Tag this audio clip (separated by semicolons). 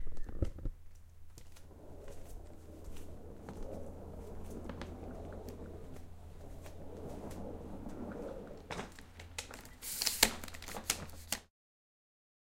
bag; OWI; handle